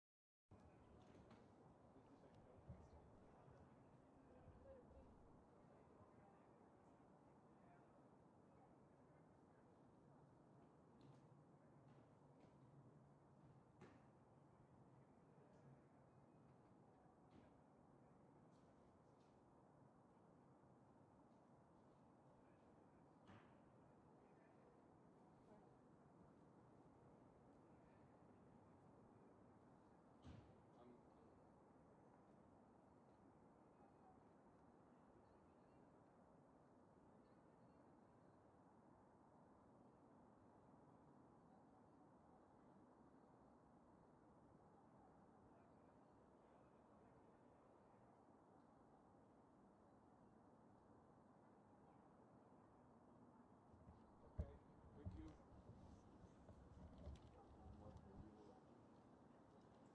atmosphere - exteriour park 3
Pleasure ground in centre of Prague: birds, dogs, trees...
exterior, park, pleasureground, atmo, ambience